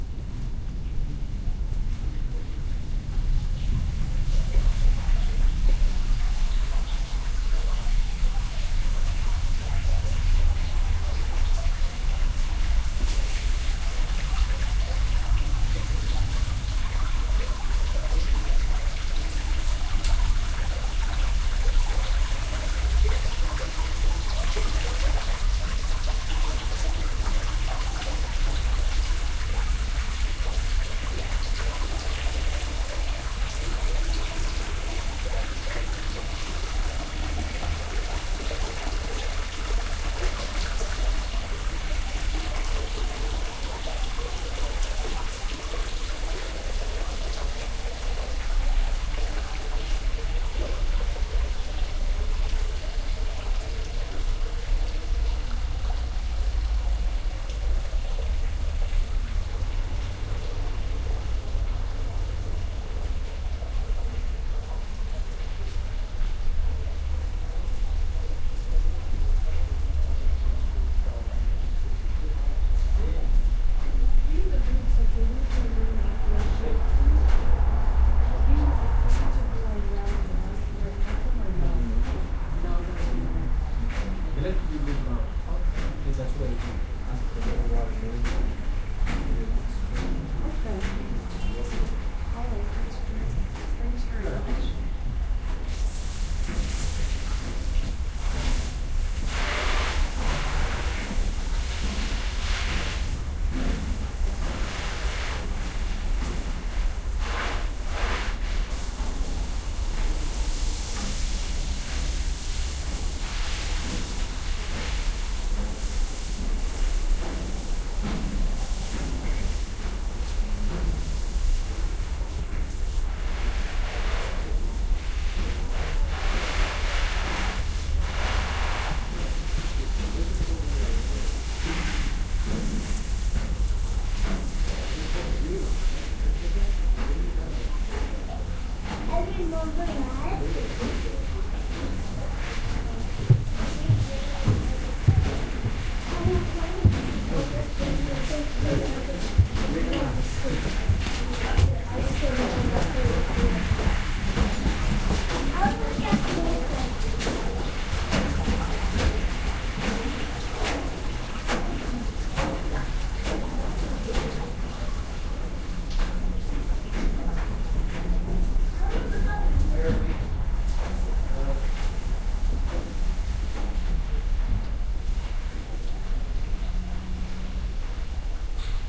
Allen Gardens Waterfall
toronto, gardens, waterfall, allen